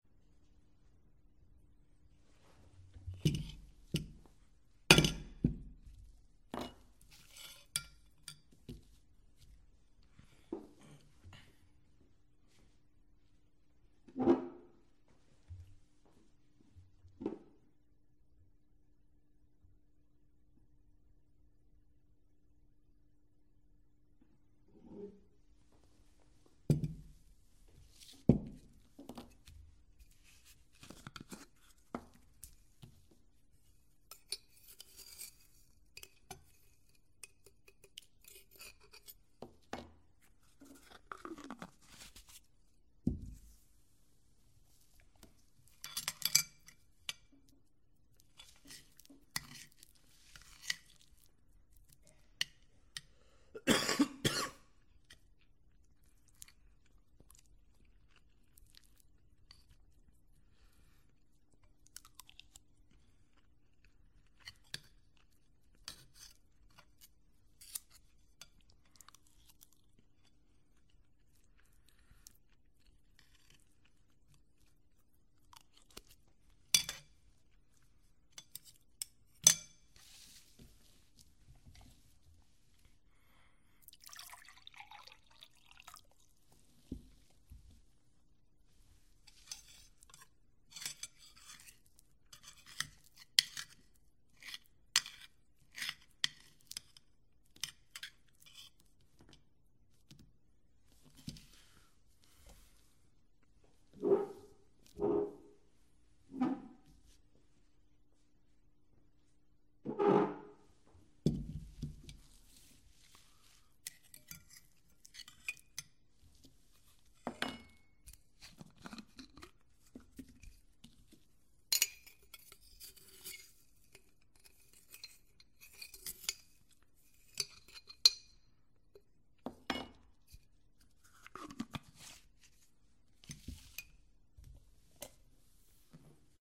secuencia de poner los cubiertos sobre la mesa. putting in silverwear
tenedor, sppon, cubiertos, silverwear, cuchara